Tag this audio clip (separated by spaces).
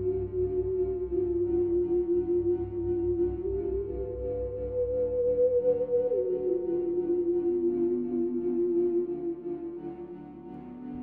fi sci